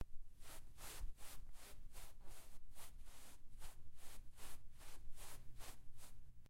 Feet Drag on Carpet
dragging my feet on carpet
carpet, feet, socks